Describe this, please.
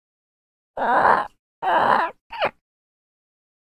Imitated by voice over Janessa Cooper with Pro Voice Master Services and done in our studios. Enjoy,